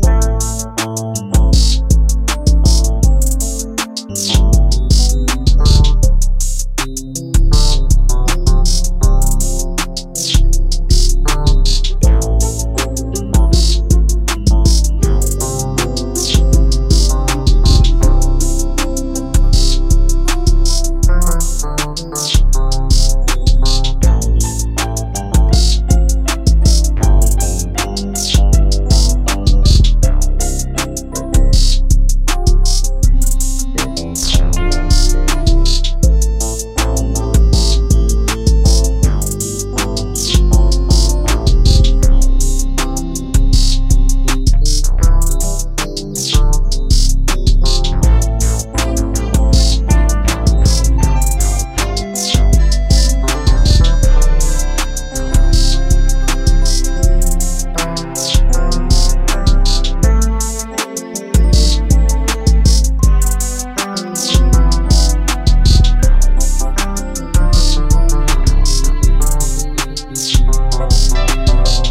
A short loop cut from my latest project. 160 BPM key of A.
Music, 160, Bass, Synth, Loop, BPM, Beat, Zen, Drums